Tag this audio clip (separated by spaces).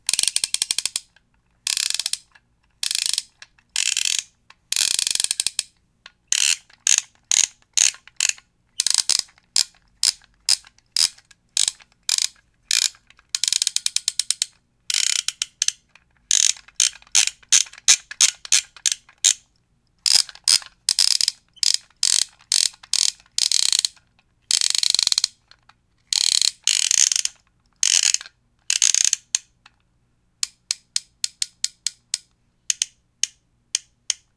clicks dial dial-turning plastic squeeky-knob toy winding